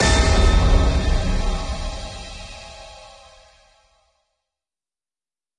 An intense hit simply to startle audiences. Best used where a feeling of sheer terror is intended.
startle, jump, shock, horror, terror, panic, bass, scare, hit, stinger, intense, emphasis